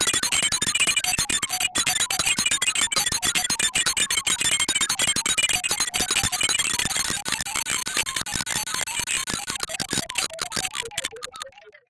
Weird HiFreq Digital BitCrushed Sound
Some kind of readout, computer thinking or calculating process.
soundeffect, electric, sounddesign, sound-design, strange, future, lo-fi, digital, computer, freaky, weird, sfx, glitch, abstract, electronic, noise, sci-fi